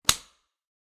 Clip In
Plastic clip on a life preserver snapping in. Recorded on my Canon 60D, cleaned up, EQed, and then a small amount of IR was added for some room feel.
Vest, Snap, Life